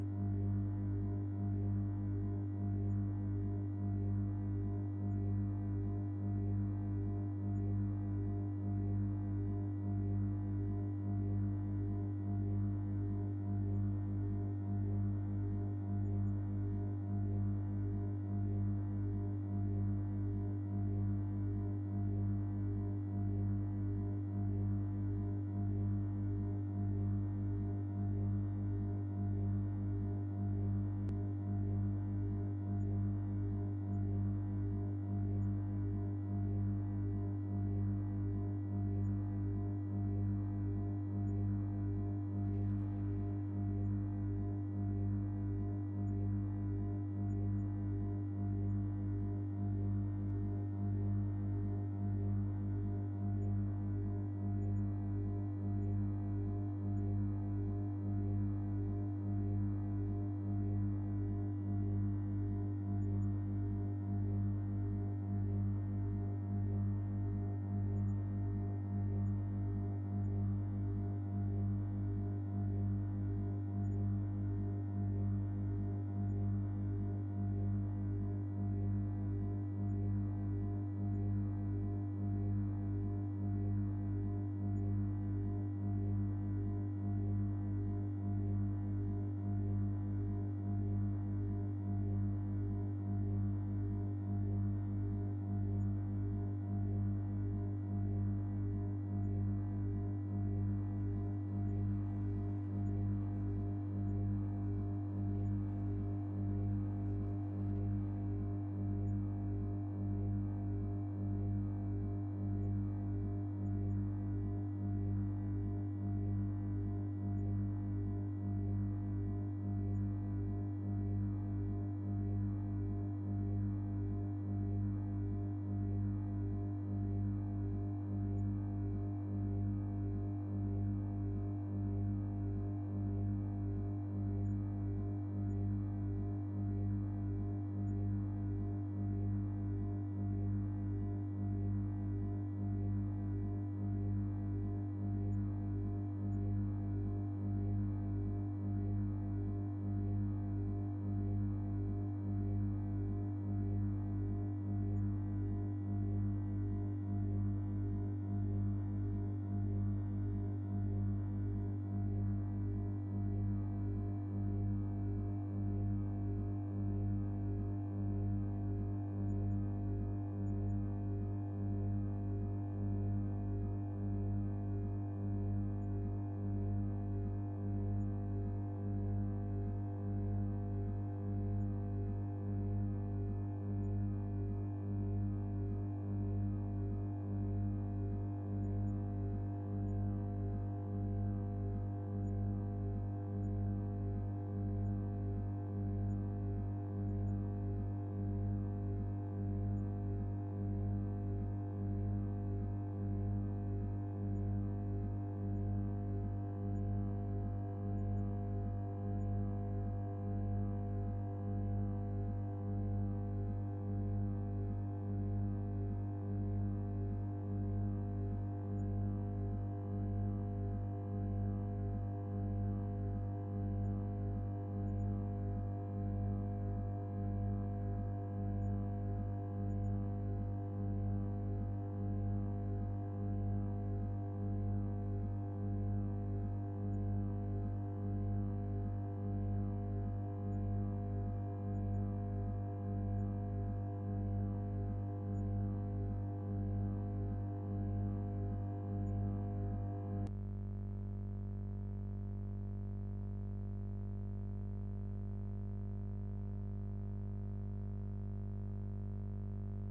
electrical hum G slightly sharp
Electrical hum created by my guitar lead resting on the table top while connected to a Line 6 box going into my laptop. The Line 6 software was also giving it lots of 'cavernous hall' reverb. Quite stereo too. It is a G as far as I can tell but slightly sharp so try shifting the pitch to get it in tune with other instruments.
electrical, G, hum